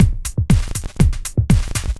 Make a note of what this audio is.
120 bpm loop